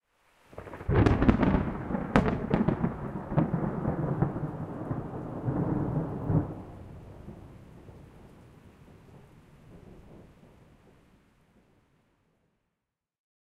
Caused by a close strike of lightning. The beginning is intense, with loud cracking, but the rumble drops off quickly. There is a tiny bit of rain noise in the background, but it is relatively quiet due to the loudness of the strike.
The lightning struck the ground roughly 1600 - 1700 metres away, or 1 mile away (deduced from the time taken for the sound to travel).
Recorded with a H4n Pro 05/09/2020
Edited in Audacity 05/09/2020
clap, crack, field-recording, lightning, rain, storm, thunder, thunderclap, thunderstorm, weather